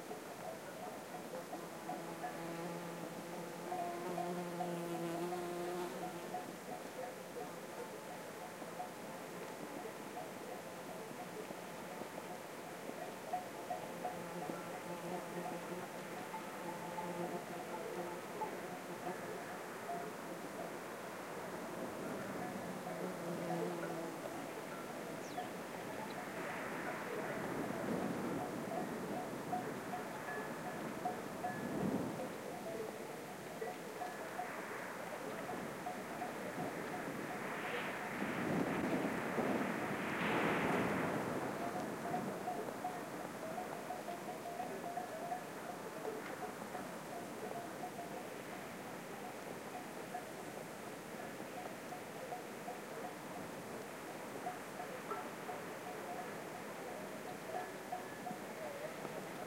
wind in grasses, insect (bumblebee) buzzing, and distant cattle bells. Shure WL183 mics, Fel preamp, PCM M10 recorder. Recorded near Refuge de Pombie, by the Midi d'Ossau masif, in the French Pyrenees
ambiance, bells, buzz, cattle, field-recording, horse, insect, mountain, pyrenees